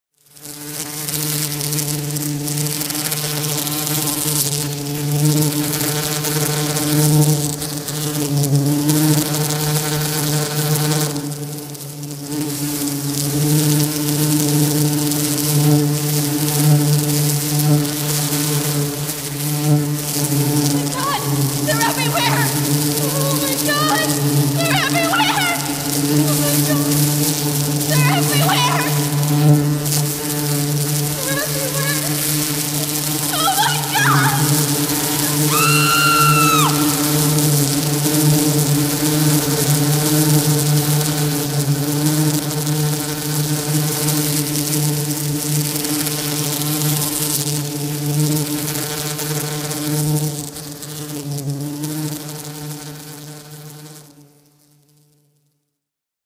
Killer Bee Attack
bee, female, killer-bee-attack, screaming, voice